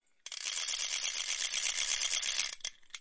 clacking, rapid wind-up sound
gears, toy